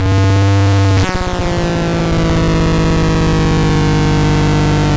APC-TuneBends1
APC, Atari-Punk-Console, Lo-Fi, diy, glitch, noise